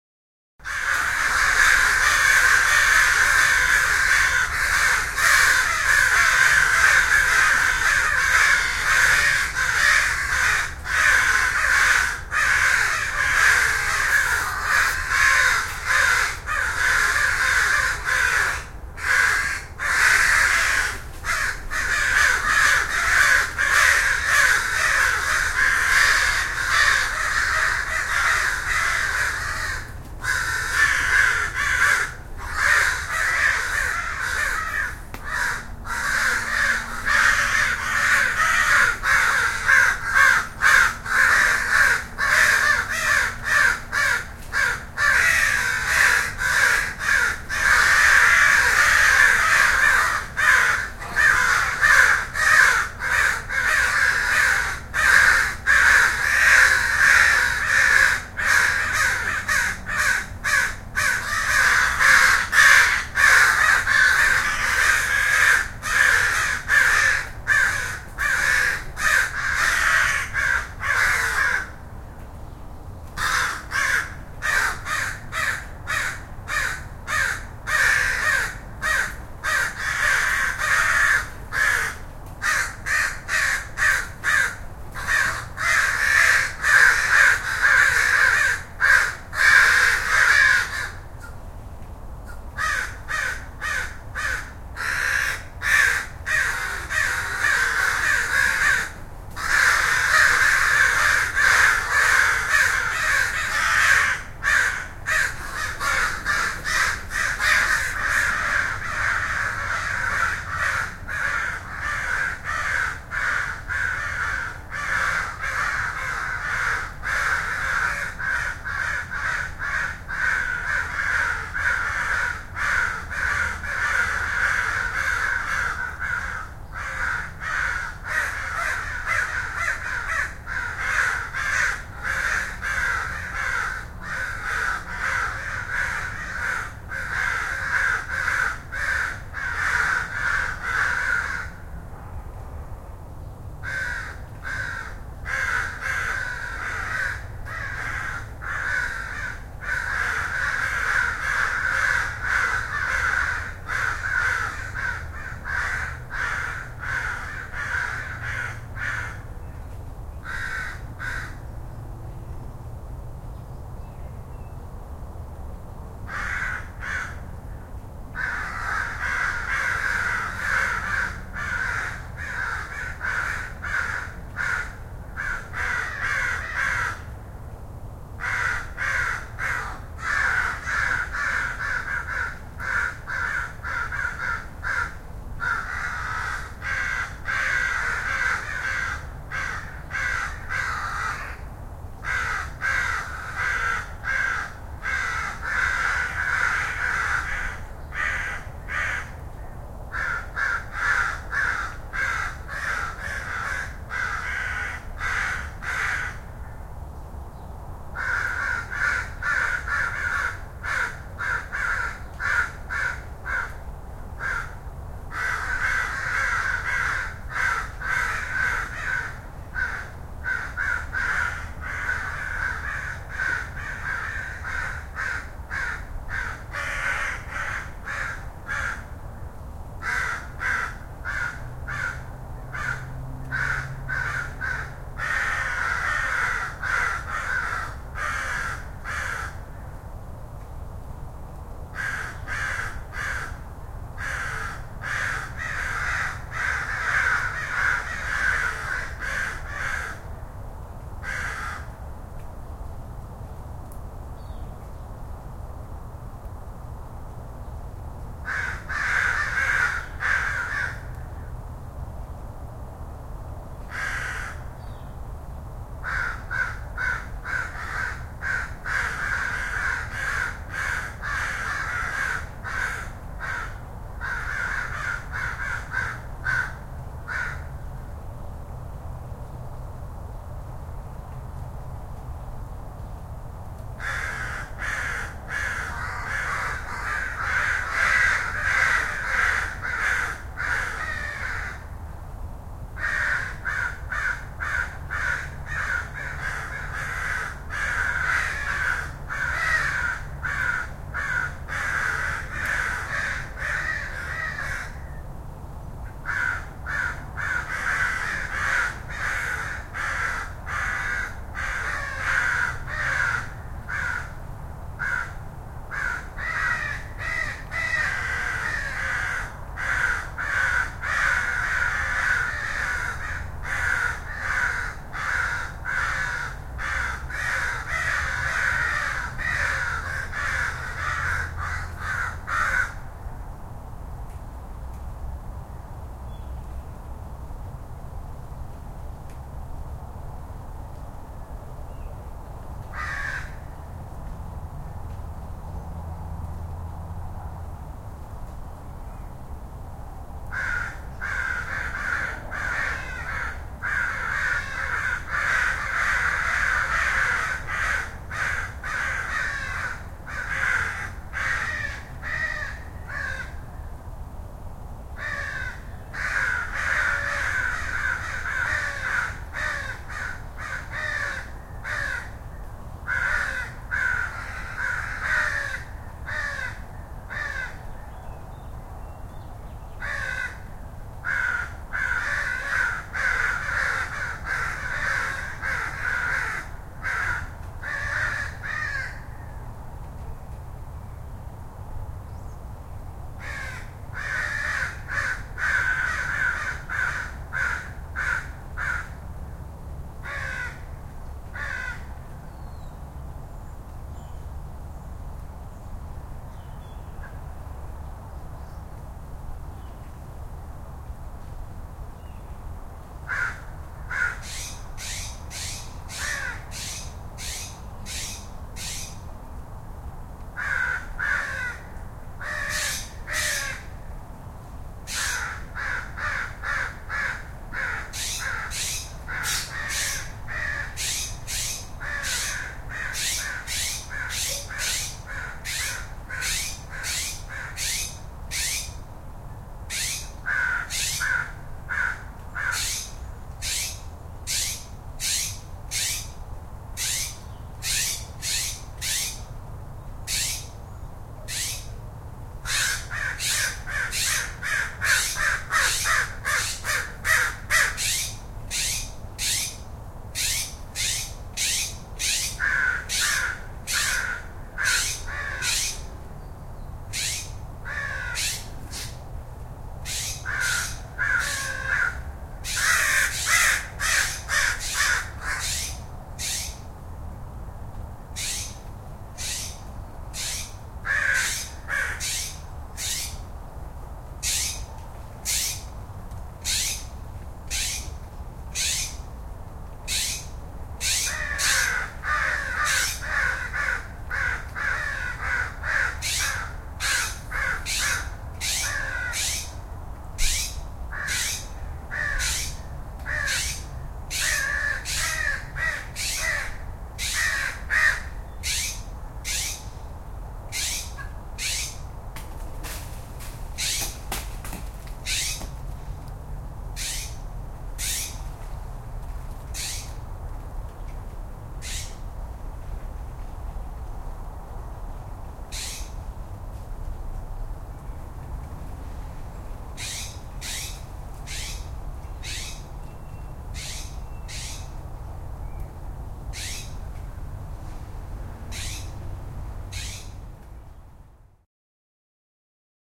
crows-jays
I made this 9 minute recording of crows in my backyard which is in a suburban neighborhood. It was recorded at about 10 o'clock in the morning on Oct. 29, 2011. There is some light traffic noise in the background. Towards the end of the recording a jay joins in the argument.
crows, field-recording, jay, Oregon